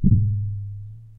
A flimsy CD/DVD shaped disk being bent sounding a bit like a strange bass drum with a ringing decay.Recorded with Zoom H4 on-board mics.